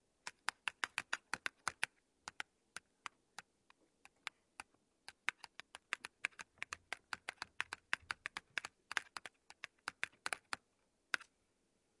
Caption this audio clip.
Sounds from objects that are beloved to the participant pupils of the Piramide school, Ghent. The source of the sounds had to be guessed.